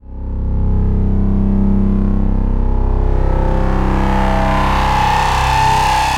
Sci-fi Explosion Build-Up
Some kind of a "jump drive" or a sci-fi power reactor about to explode.
Created with a synthesizer in MuLab.
futuristic, build-up, fx, build, buildup, up, mechanical, machine, future, science-fiction